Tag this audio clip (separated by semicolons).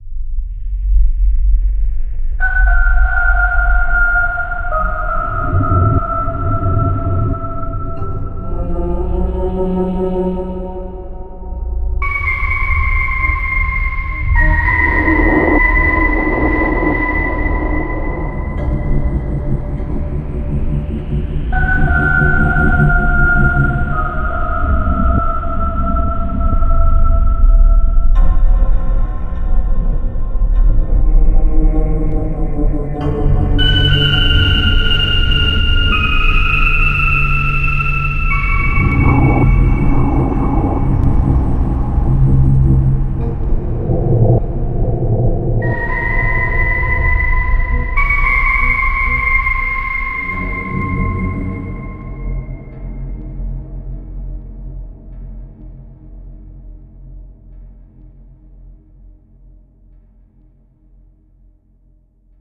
Scary; Ghost; Creature; Sci-Fi; Zombie; Thriller; Wicked; Fantasy; Monster; Devil; Sinister; Nightmare; Reverb; Sounds; Vampire; Spooky; Atmosphere; Horror; Melody; Mystery; Creepy; Eerie; Halloween; Evil; Haunting; Dark; Haunted; Music; Hell; Strange